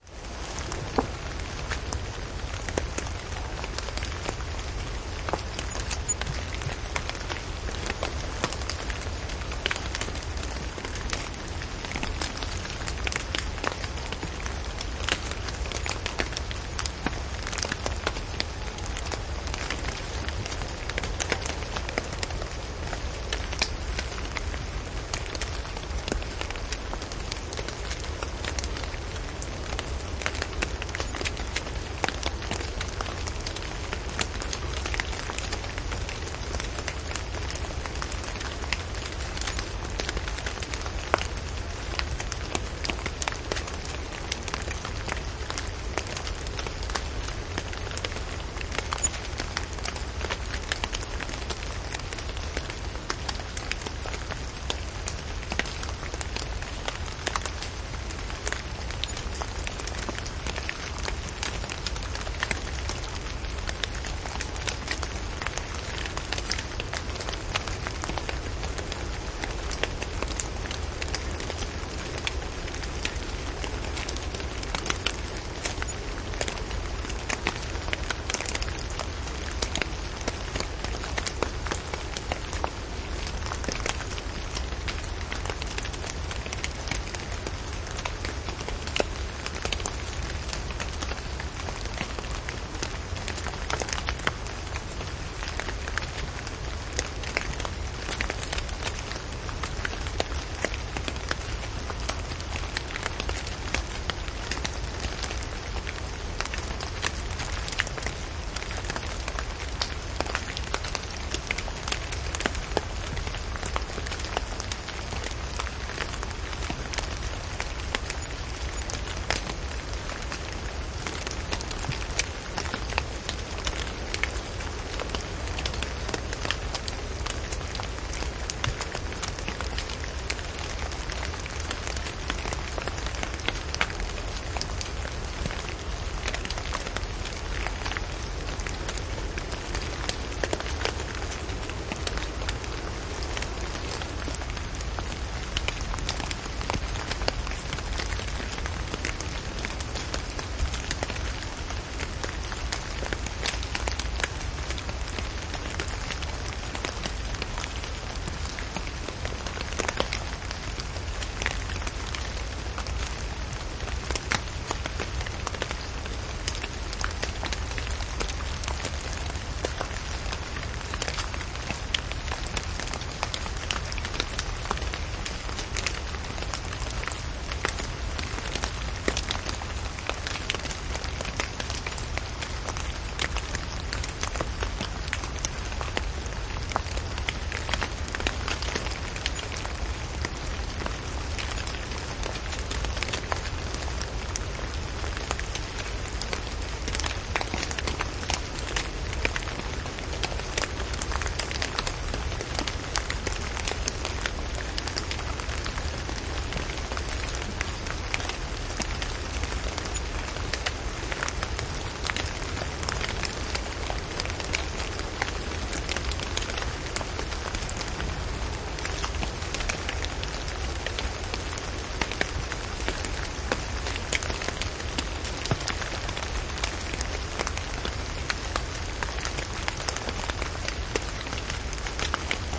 Drops of rain in a silent forest by night. Loud drops are falling on a soil of fallen wet leaves.
France, jan 2023
Recorded with schoeps AB ORTF
recorded on Sounddevice mixpre6